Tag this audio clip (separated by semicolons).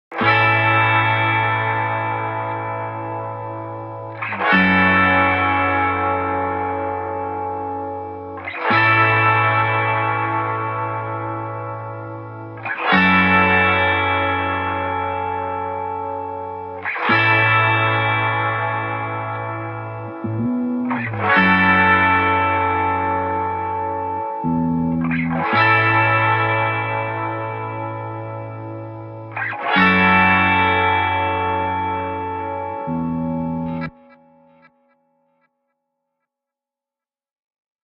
delay; stereo; mojo; mojomills; guitar; st; amb; ambient; electric; spacey